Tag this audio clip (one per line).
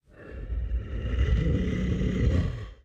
animal
bear
beast
breath
breathe
breathing
creature
growl
horror
inhale
monster
roar
scary